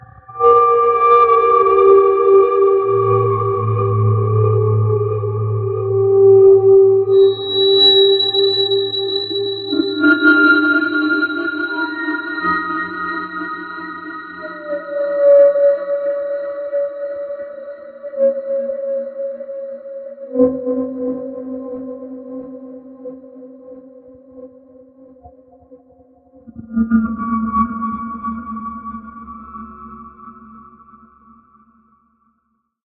Samurai Jugular - 05
A samurai at your jugular! Weird sound effects I made that you can have, too.
dilation, effect, experimental, high-pitched, sci-fi, sfx, sound, spacey, sweetener, time, trippy